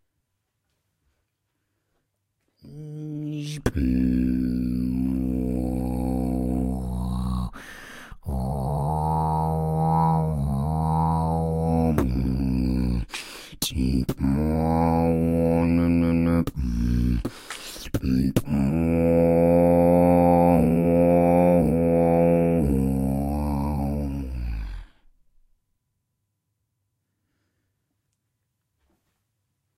A low bass beat I made Beat-boxing
Bass, Beat-box, Dark, drum, vocal